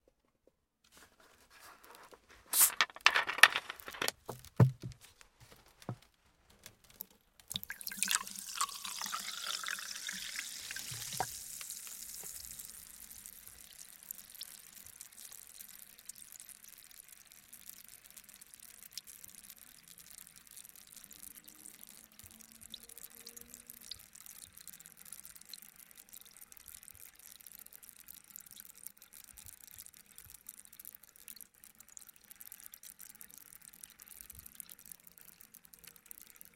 seltzer cleaned
Monaural recording of seltzer water being poured into a glass from a plastic bottle. The bottle cap is unscrewed with a hiss of escaping CO2 and the water is poured. The recording lingers to capture the bubbling of the seltzer water. Recorded with a Sennheiser ME66 microphone and a Marantz PMD660 audio recorder. Minor noise reduction applied.